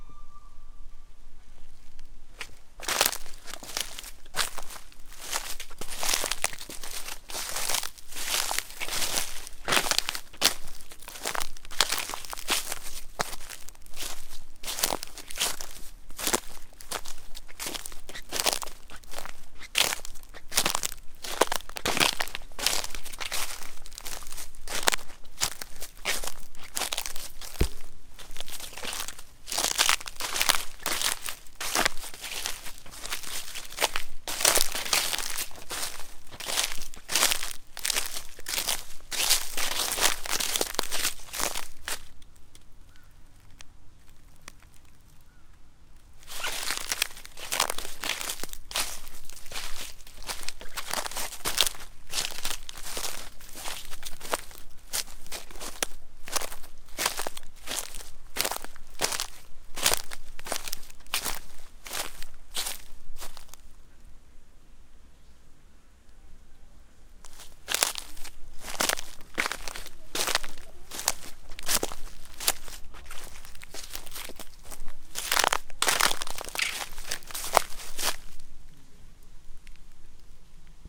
Walk in dry leaves in the forest
Steps of man walking in the forest on dry leaves, stopping and walking again.
walk, forest, footsteps, leaves, walking, steps